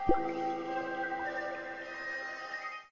Flute Pang 3s
a small sample with live flute processed with a pure data patch (modulare)
flute, flute-fx, processed